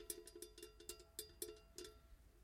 cristal tinkling Earthquake Train
Cristal glasses on moving train, Chains , Battle Mesh
chain, chains, cristal, earthquake, glass, glasses, medieval, mesh, motion, moving, quake, rattling, shake, shaked, shaking, train, trembling